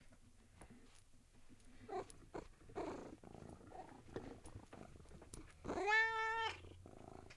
my cat purring